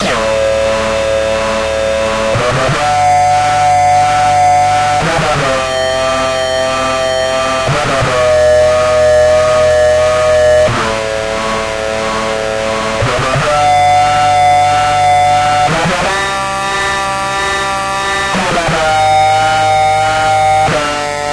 Hard Lead Loop 90 BPM
A hard lead loop recorded at 90bpm in cubase. Patch designed in NI Massive
loop, melody, lead, 90bpm, distortion